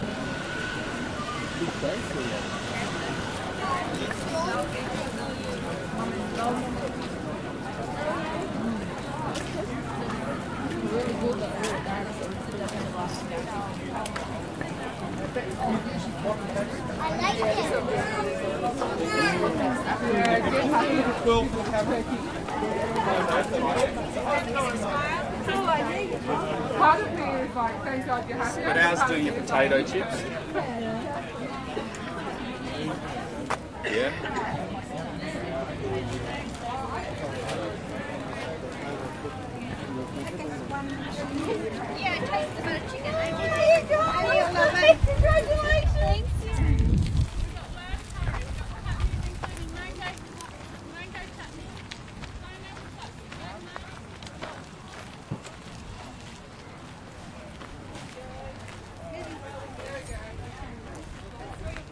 Floriade 2013 - walking past crowd
Floriade in canberra 2013 - lots of people looking at flowers, taking photos talking, walking, some small rides, bands in the park, a old time pipe organ
crowds, grass, gravel, past, talking, walking